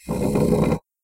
Chair Movement 02
Chair movement.
This sound can for example be used in games, for example when the player moves objects made out of wood, for example chairs and tables - you name it!
If you enjoyed the sound, please STAR, COMMENT, SPREAD THE WORD!🗣 It really helps!
chair, drag, dragging, drags, floor, furniture, loud, movement, room, wood, wooden